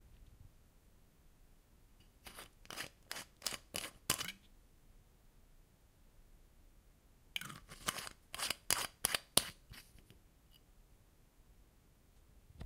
Screw top from a wine bottle off and on
A metal screw top/cap being taken off and put back on a glass wine bottle again.